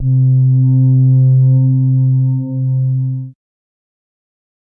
Alien Alarm: 110 BPM C2 note, strange sounding alarm. Absynth 5 sampled into Ableton, compression using PSP Compressor2 and PSP Warmer. Random presets, and very little other effects used, mostly so this sample can be re-sampled. Crazy sounds.
110, acid, atmospheric, bounce, bpm, club, dance, dark, effect, electro, electronic, glitch, glitch-hop, hardcore, house, noise, pad, porn-core, processed, rave, resonance, sci-fi, sound, synth, synthesizer, techno, trance